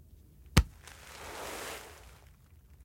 punch crunchy grass
punch, crunchy, grass